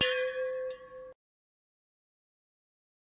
Megabottle - 11 - Audio - Audio 11
Various hits of a stainless steel drinking bottle half filled with water, some clumsier than others.
bottle, hit, ring, steel, ting